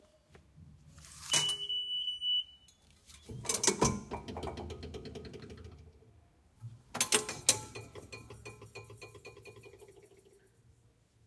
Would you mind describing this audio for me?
Subway, card swipe, double beep and turnstile
Swiping a Metrocard with sufficient fare in the NYC subway produces a double-beep
swipe, underground, york